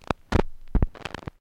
Various clicks and pops recorded from a single LP record. I carved into the surface of the record with my keys, and then recorded the needle hitting the scratches.
analog, glitch, noise, record